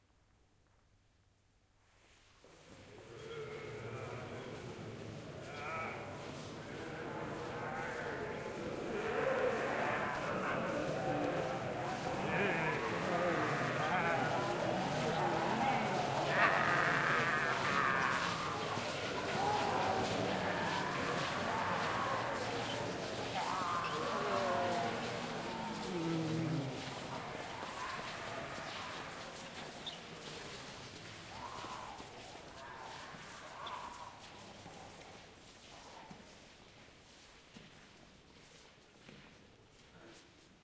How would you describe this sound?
Zombie Shuffle
Crowd of people shuffling from behind the recorder to the front - concrete floor - feet shuffling - groaning noises.
Recorded at Melbourne Docklands Studios
4CH Surround Zoom H2N
Thanks <<<<<
Mark Edwards
Greenside Productions